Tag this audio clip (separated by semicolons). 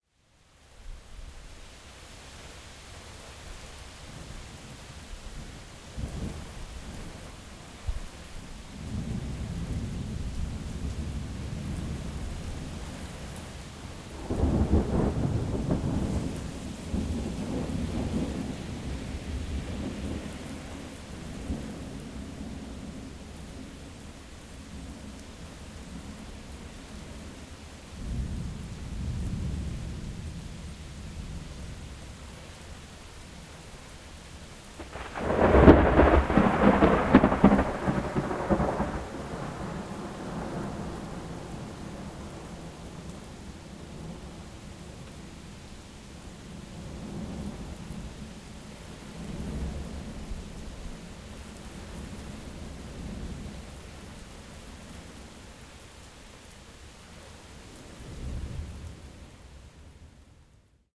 thunder; rain